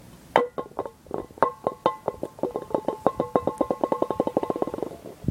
Rolling Can 28
Sounds made by rolling cans of various sizes and types along a concrete surface.